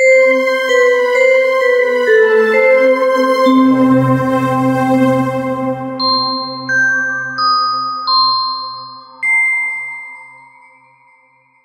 10 seconds Loop #2
Fantasy loop made in FL Studio.
2019.
intro, mystic, music, fairy, santa, synth, cute, effects, magic, victory, mystery, christmas, music-box, magical, 10-seconds, enchantment, thriller, loop, fantasy, gloom, mystical, xmas